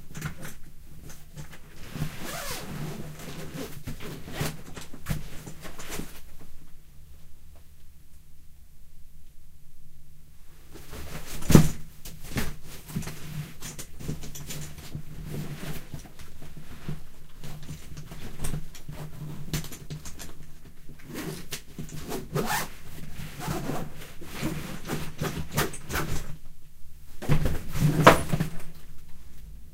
Zipping a full suitcase, then standing it up. Stereo binaural recording.
packing, zipper, zipping, pack, foley, suitcase, zip, binaural, stereo